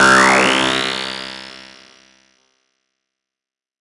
Blips Trails: C2 note, random short blip sounds with short tails from Massive. Sampled into Ableton as instant attacks and then decay immediately with a bit of reverb to smooth out the sound, compression using PSP Compressor2 and PSP Warmer. Random parameters, and very little other effects used. Crazy sounds is what I do.